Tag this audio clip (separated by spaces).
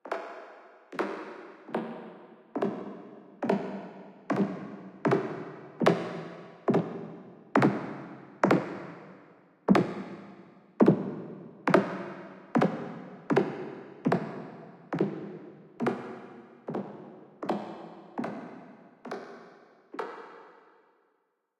footsteps foot stereo hall layer floor feet wood boots boot walking synthesis reverb walk steps binaural